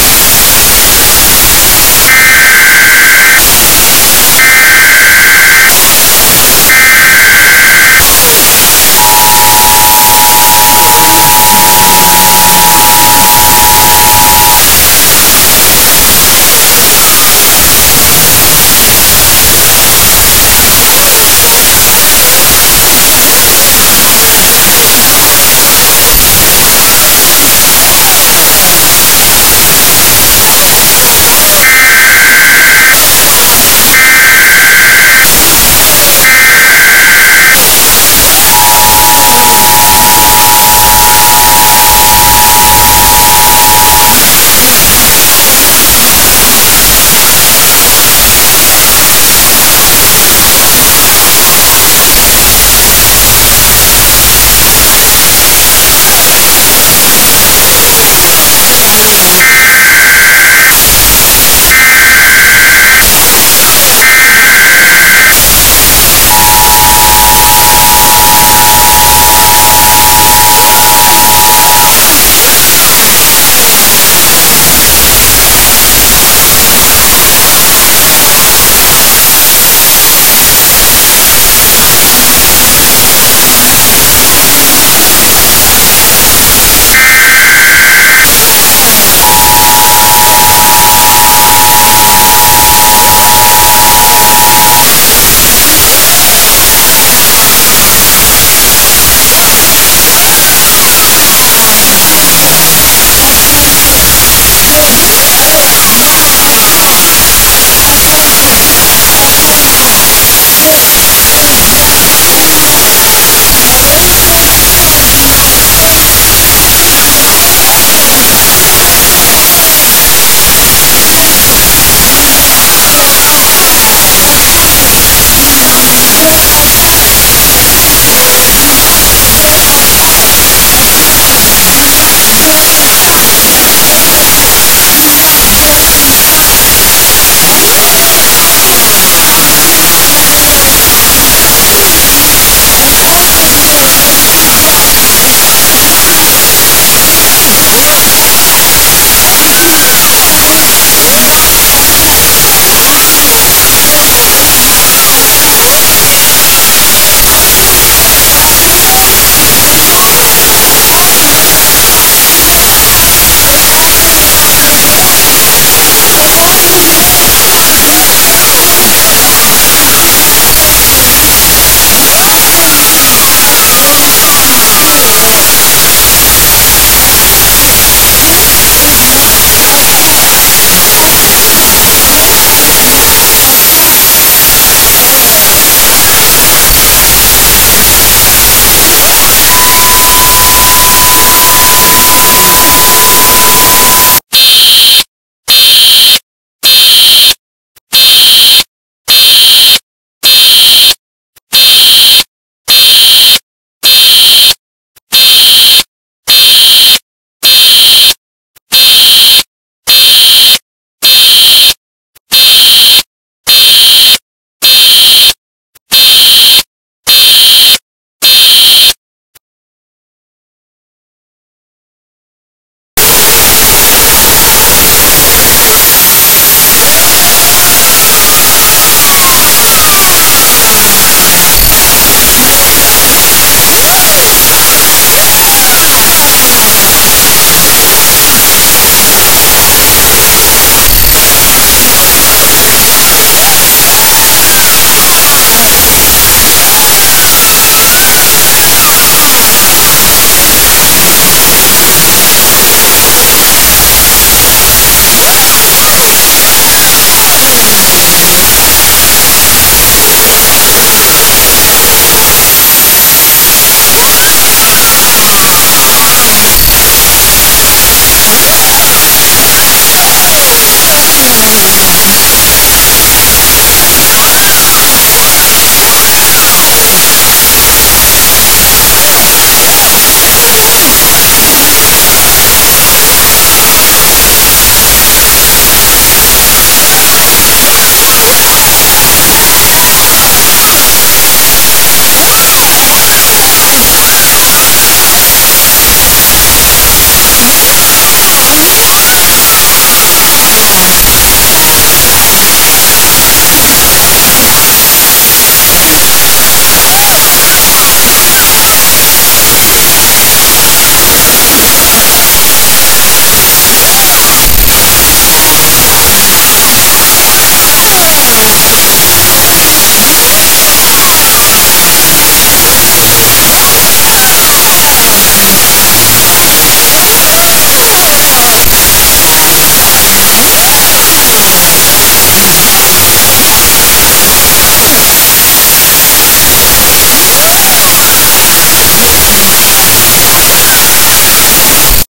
This Sound I Create This In Audacity
hi
Radio, Noise, Sound, Static, Hurricane, Warning